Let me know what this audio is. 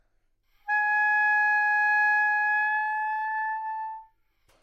Oboe - G#5 - bad-dynamics-bad-timbre-bad-pitch
Part of the Good-sounds dataset of monophonic instrumental sounds.
instrument::oboe
note::G#
octave::5
midi note::68
good-sounds-id::8135
Intentionally played as an example of bad-dynamics-bad-timbre-bad-pitch
good-sounds, Gsharp5, multisample, neumann-U87, oboe, single-note